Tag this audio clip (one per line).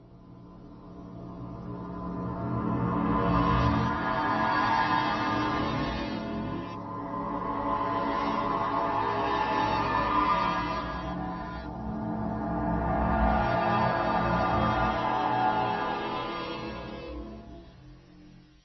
Nightmare
Reverb
Reverse
ReverseScream
Spooky